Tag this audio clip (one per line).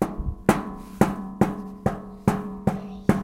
Essen
Germany
School
SonicSnaps